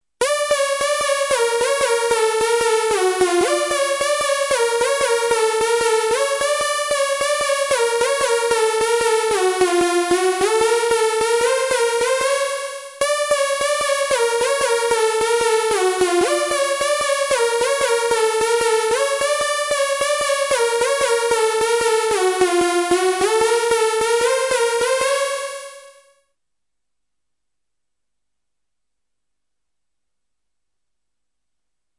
I tried to write something to go with a horror film !
film, horror, sinister